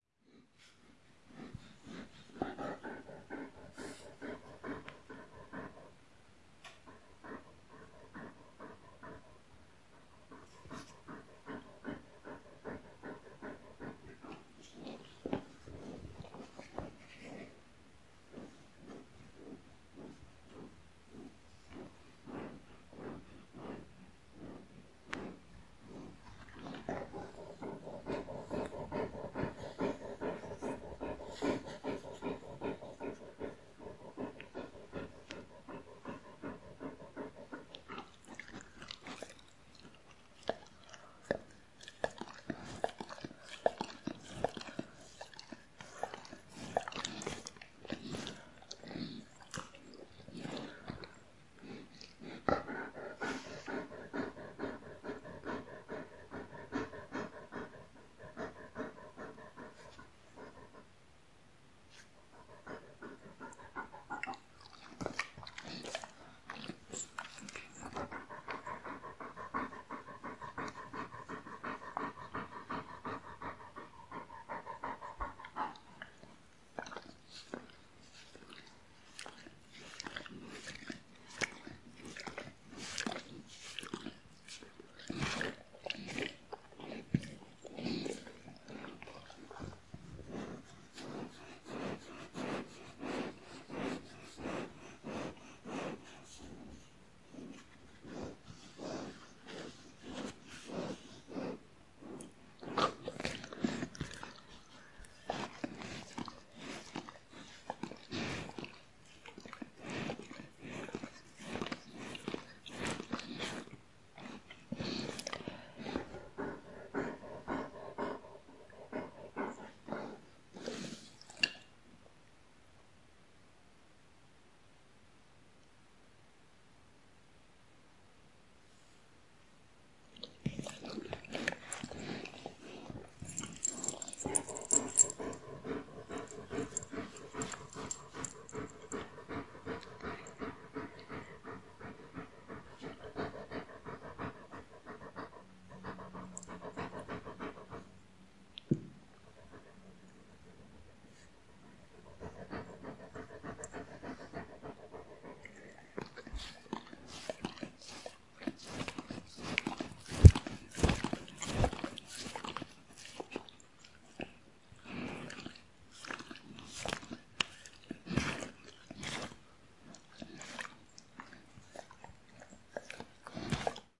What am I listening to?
My dog, how he rests, smacking and panting.